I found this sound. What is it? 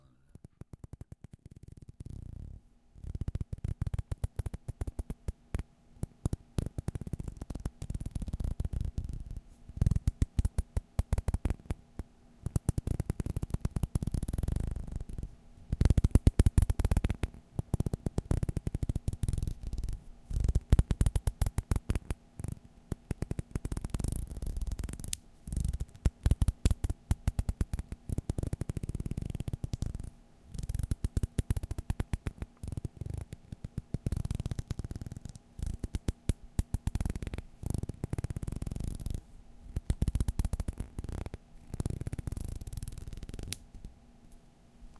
rubbing fingers
My wife rubbing her fingers together